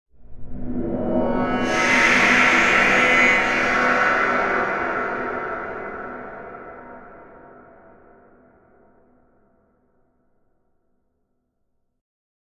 Horror Cinema 9 2014
Using Fluid V 1.5 as a sampler adding enveloped sine and saw waves. Was then later processed with Absynth 5.
Ambient, Atmosphere, Creepy, Dark, Digital, Film, Horror, Indie, Scary, SFX, Transition